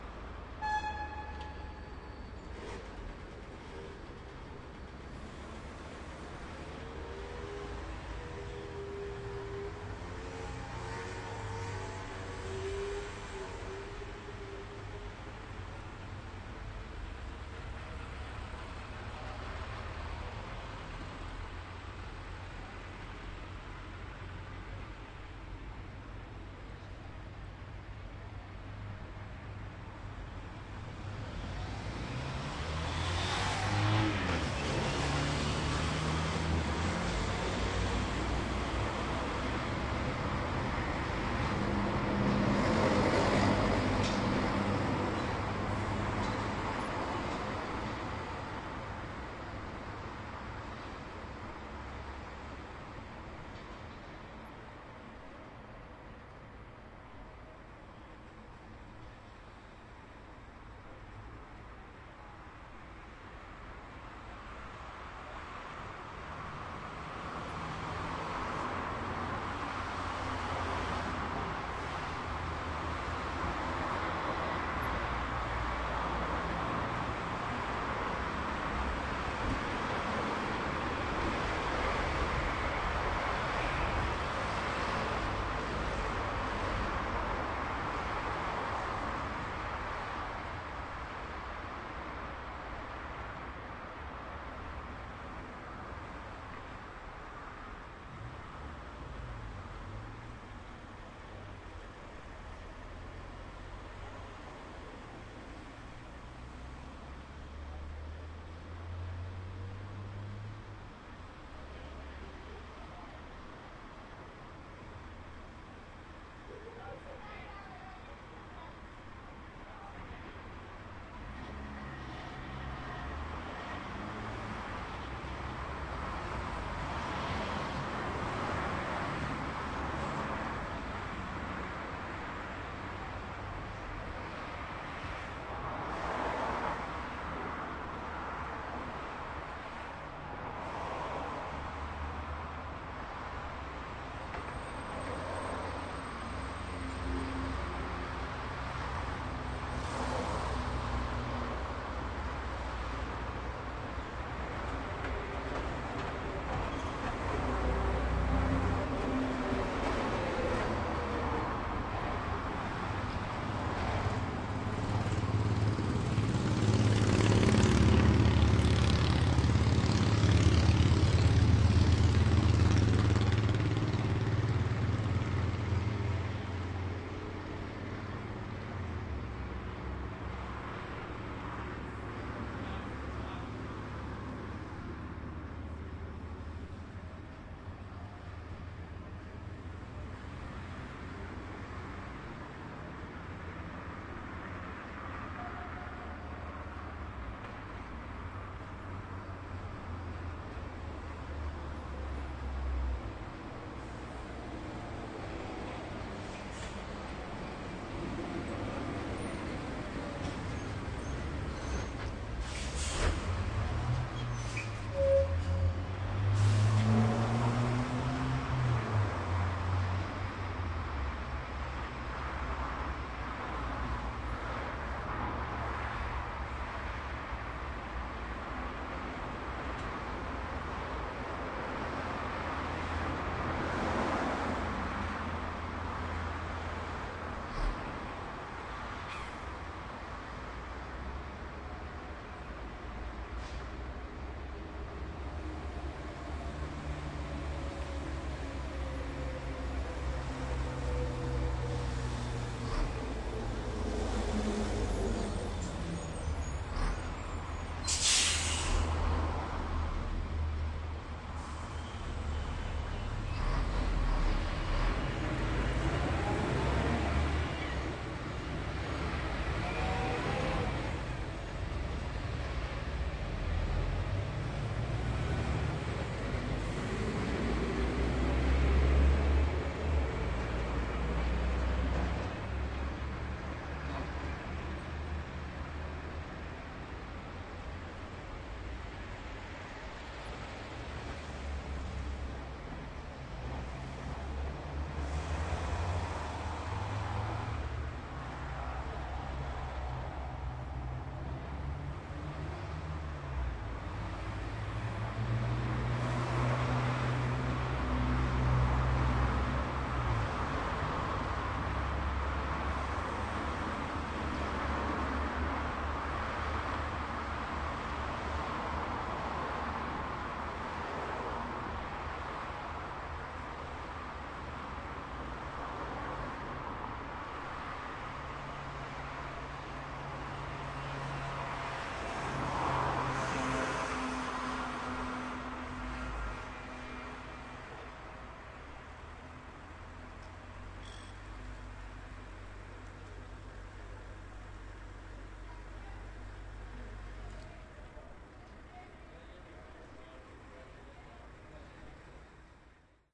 citySoundscape Midnight Rijeka Mlaka --16
field recording from part of city Rijeka called Mlaka, there is one nice fat motorcycle with other traffic. Recorded with ZoomH2 attached to fishpole on 3m height...
field, midnight, mlaka, rijeka, soundscape, unprocessed